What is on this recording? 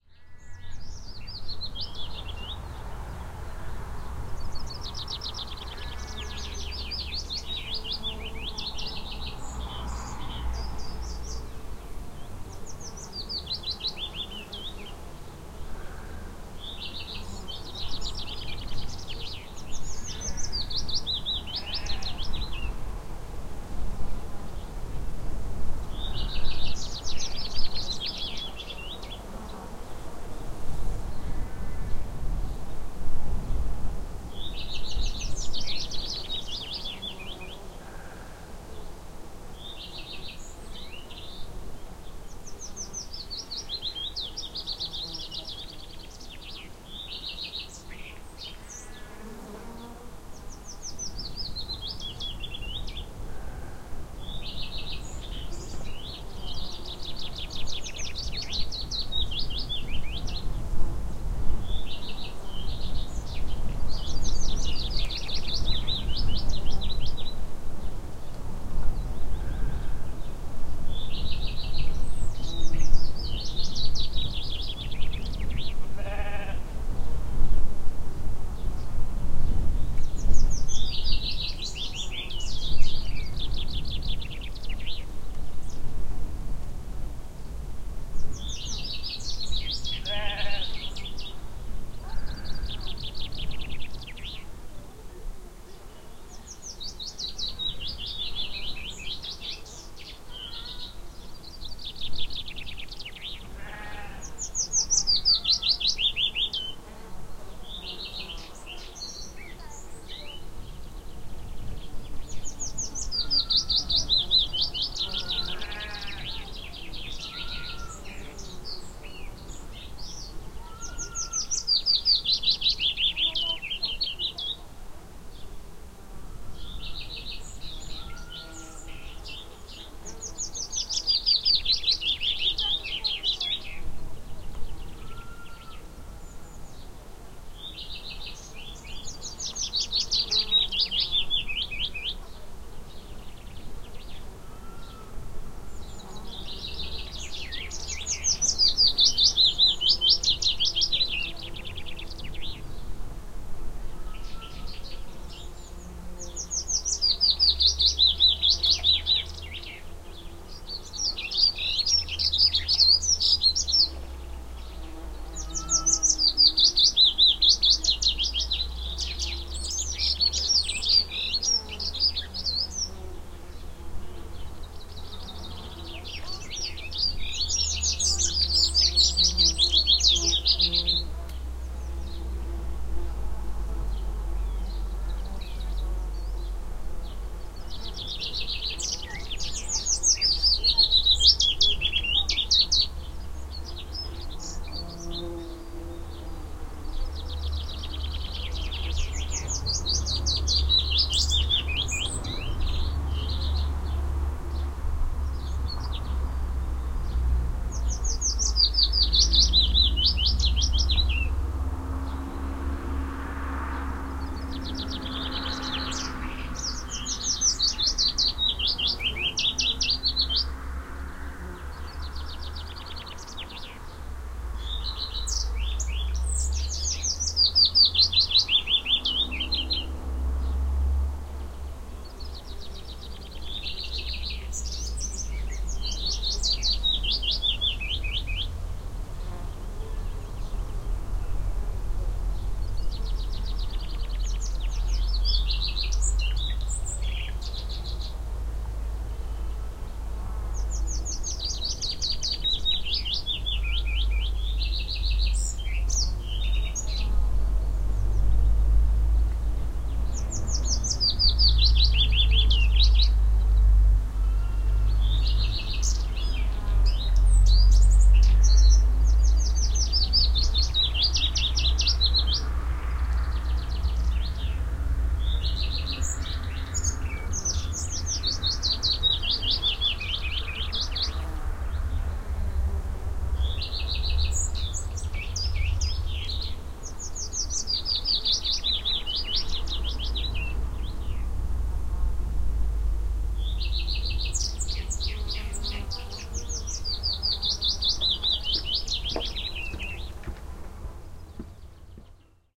A field recording test to hear what these low noise omni capsules sound like. There will be no stereo field because both capsules were very close together in a Dead Cat, windy conditions.FEL BMA1 pre.